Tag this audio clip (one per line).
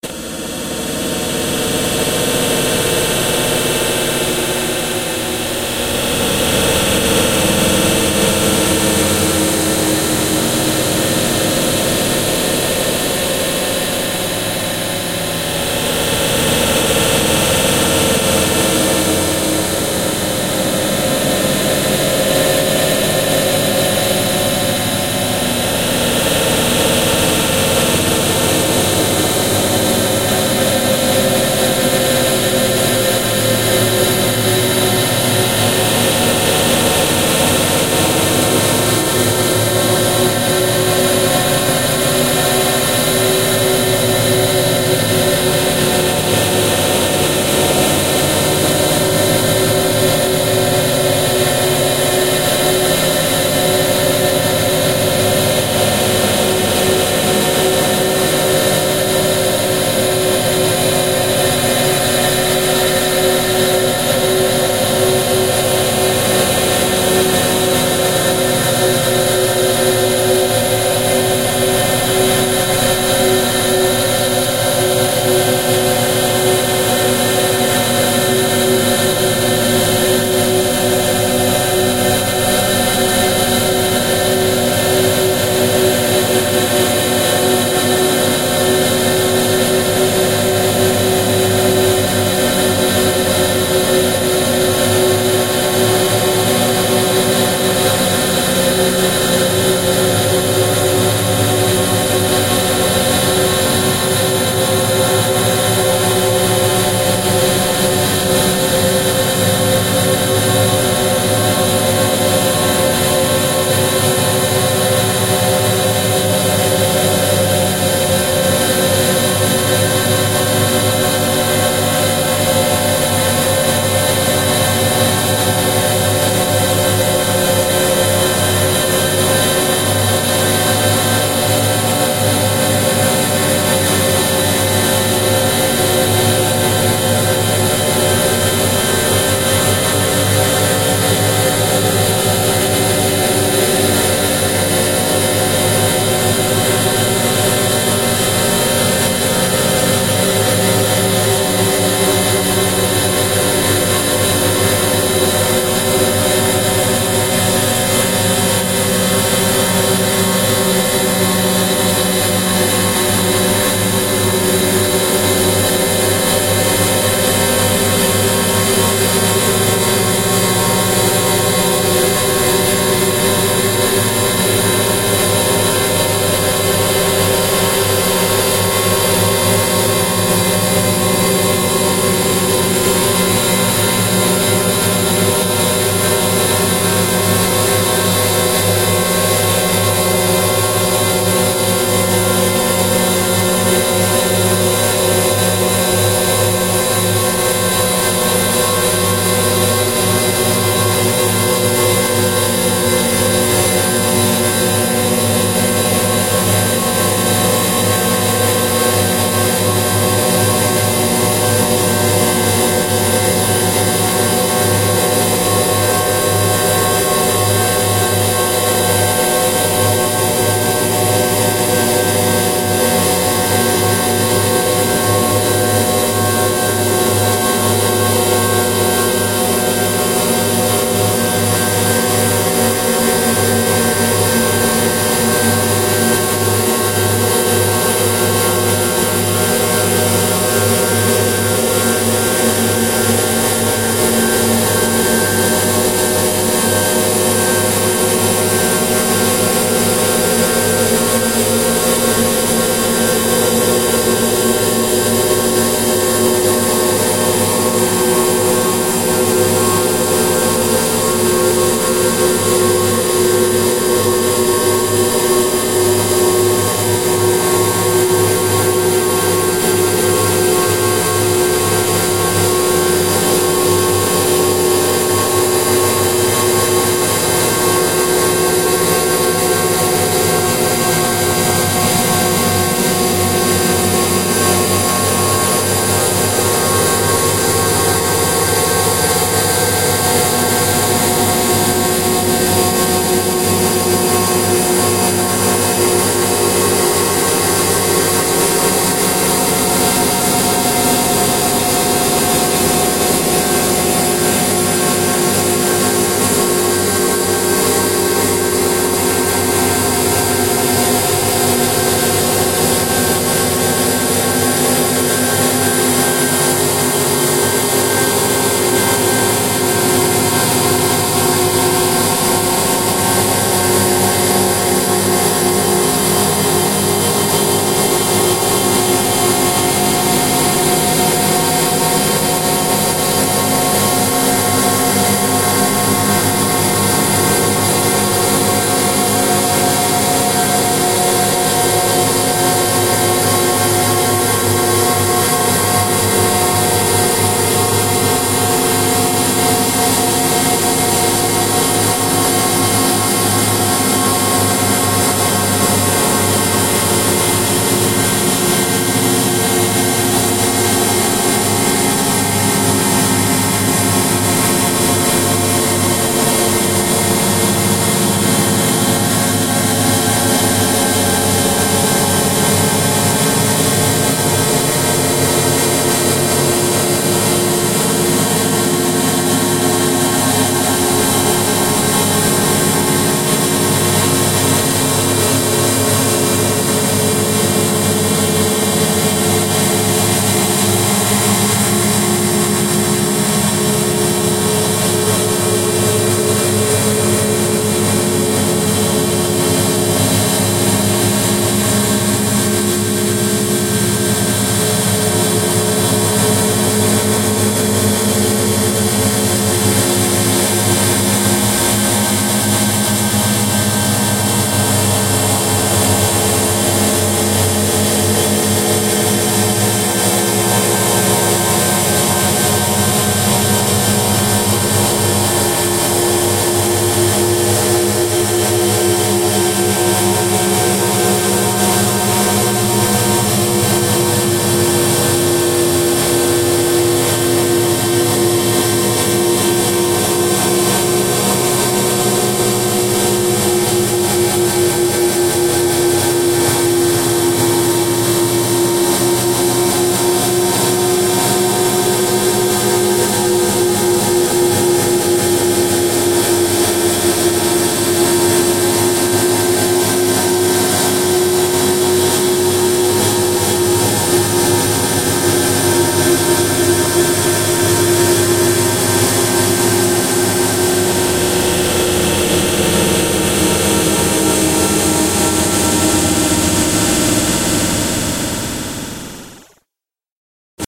digital
ominous
witch-house